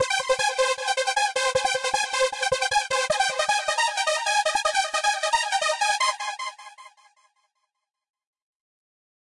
another riff i created for a trance track